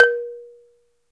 A balafon I recorded on minidisc.
africa, balafon, percussive, wood